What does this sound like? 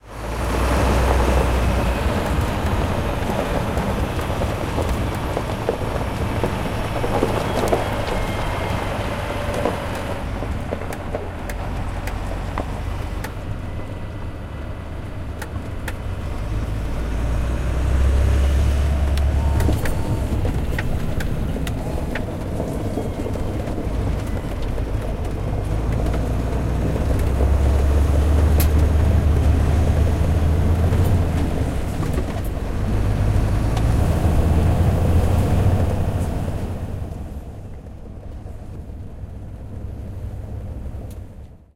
03.08.2011: fourth day of the research project about truck drivers culture. On the way to weight truck loaded with blackcurrant. From the field to some agro-company.